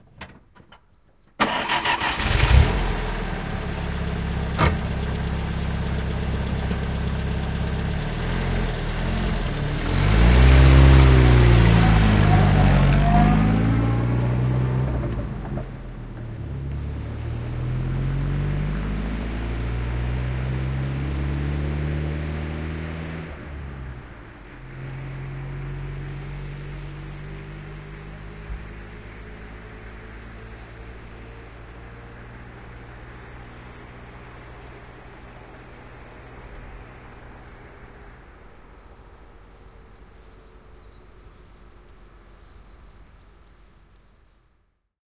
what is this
Henkilöauto, vanha, lähtö asfaltilla / An old car pulling away calmly on asphalt, Pobeda, a 1957 model
Pobeda, vm 1957. Käynnistys lähellä, hetki tyhjäkäyntiä, vaihde rusahtaa, rauhallinen lähtö asfaltilla, etääntyy. (Pobeda, 2.12 l, 4-syl. 52 hv).
Paikka/Place: Suomi / Finland / Noormarkku
Aika/Date: 05.08.1996